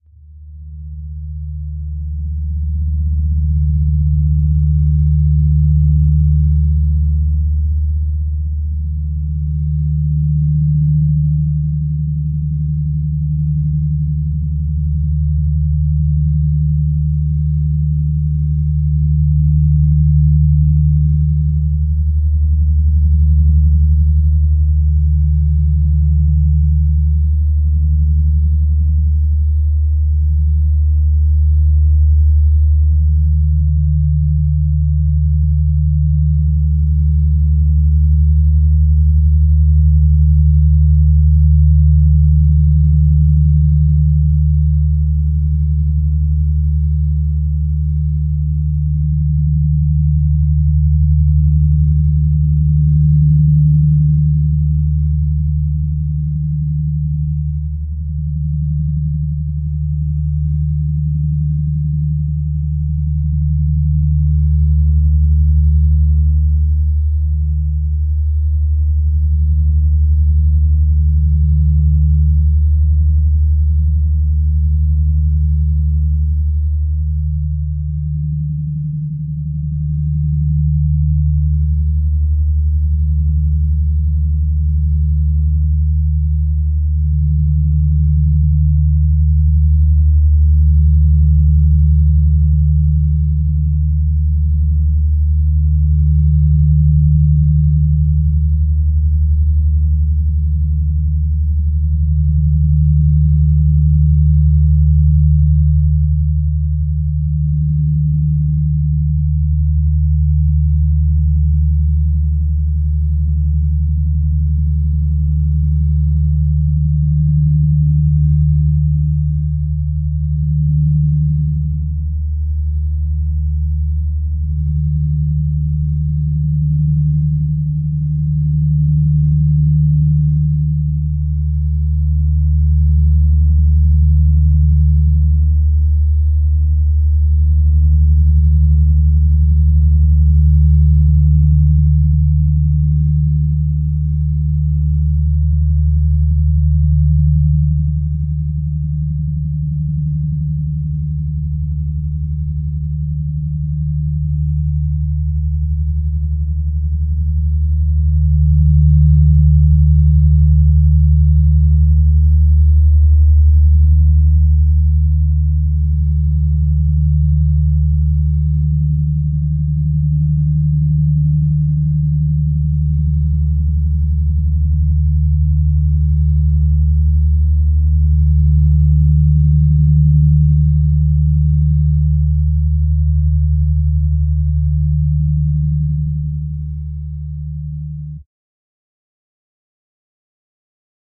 D phase drone 01
A Phase drone sound in the key of D. Made in ZynAddSubFX, a software synthesizer software made for Linux. This was recorded and edited in Audacity 1.3.5 beta, on Ubuntu Linux 8.04.2 LTS. Also i have added more phase effect and equalized it.